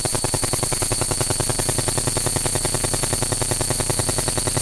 VCS 3 Sound 12
Sounds made with the legendary VCS3 synthesizer in the Lindblad Studio at Gothenborg Academy of Music and Drama, 2011.12.09
The character of the sound is of some mystic fluctuating, electrical noise.
Analog-Noise, Analog-Synth, Modular-Synth, Spring-Reverb, VCS3